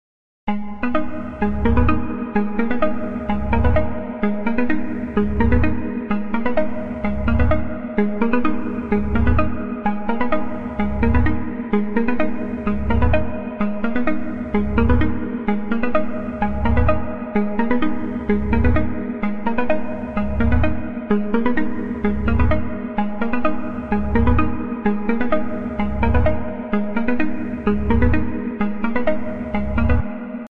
Arpeggio loop, kinda trancey/progressive. Atmospheric with reverb.